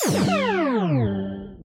Going through a portal to another dimension, laser weapon being activated, warning signal, whatever crazy sci-fi situation you can think of, this sound will probably fit.
game, laser, space, power, electric, teleport, digital, future, science, sci-fi, electricity